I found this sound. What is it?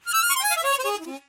Chromatic Harmonica 16
A chromatic harmonica recorded in mono with my AKG C214 on my stairs.
chromatic, harmonica